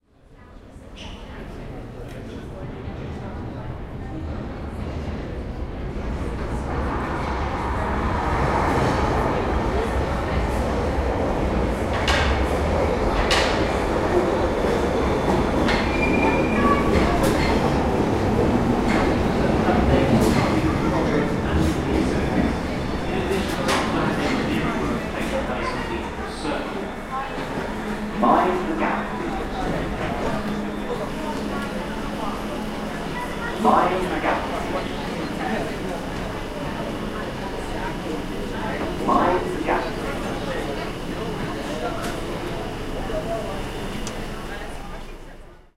London Underground, Arriving, A
Raw audio of a London Underground train arriving on the Bakerloo line at London Waterloo. The classic "Mind the Gap" can also be heard. Taken around 1:15PM on the weekend, so very crowded (though, you'd be hard pressed to find a time when it isn't crowded!)
An example of how you might credit is by putting this in the description/credits:
The sound was recorded using a "H1 Zoom recorder" on 9th September 2017.
announcement, Arriivng, arriving, bakerloo, gap, london, mind, subway, The, train, tube, underground